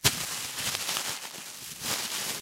delphis PLASTIC CRUNCH LOOP 04 #100
crunch, loop, percussion, bpm120, plastic